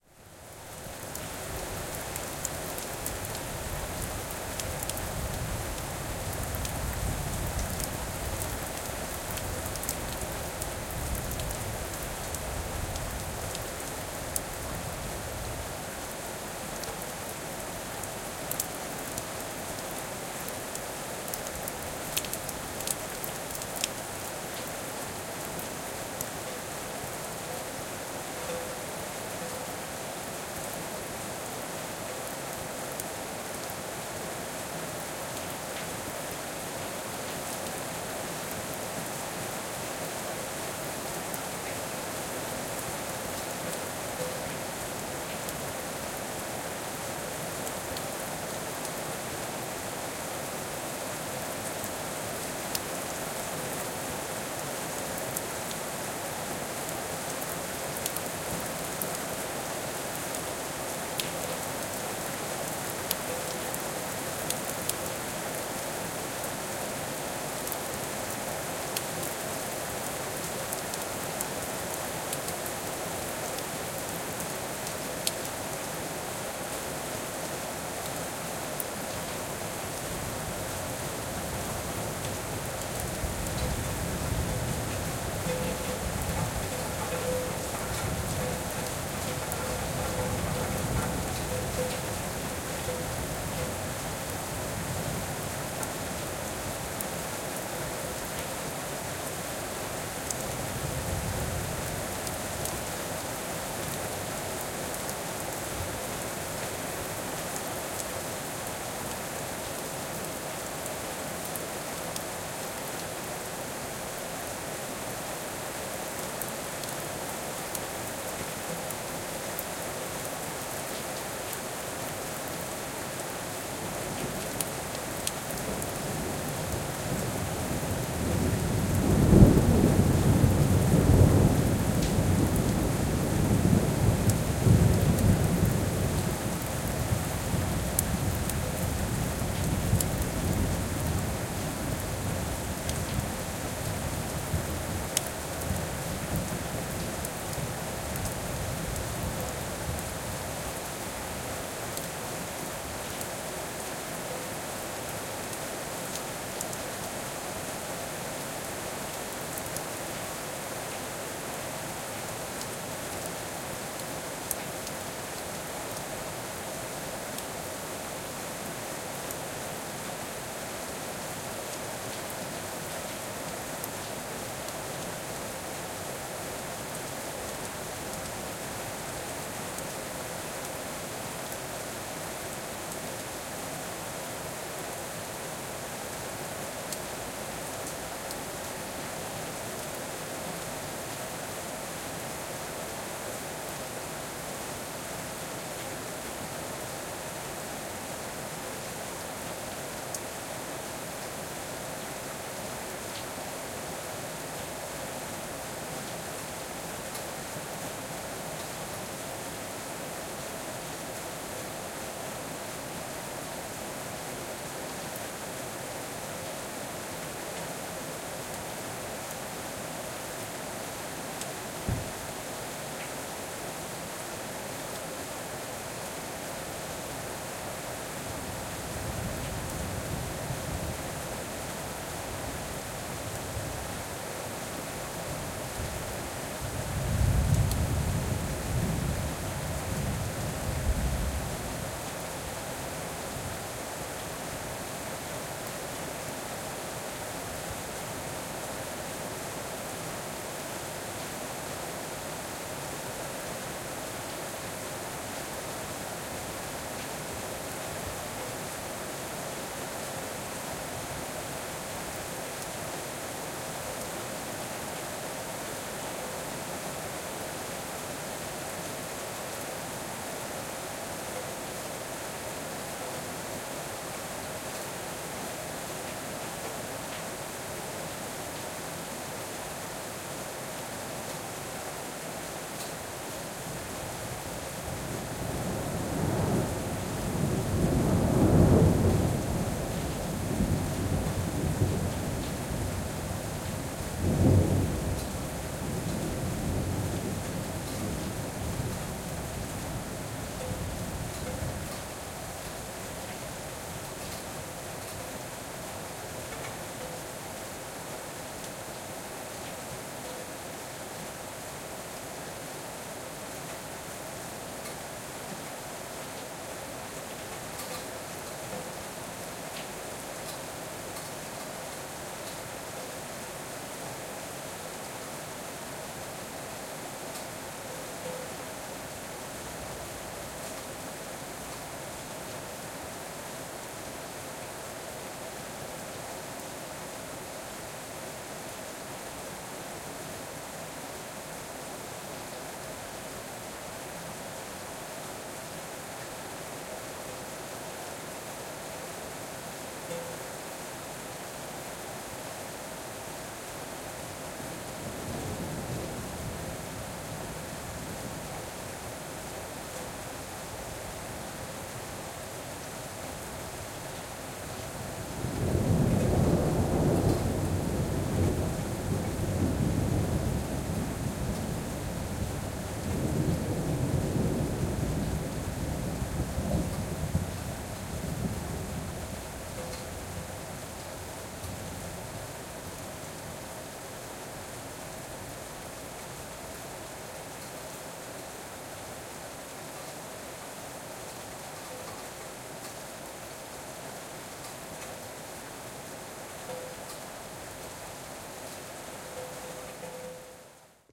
Rainy night with thunder and water dropping
Backyard in a city with heavy rain and thunder. You hear the rain dropping on the floor and sometimes on the drain.
storm, drops, city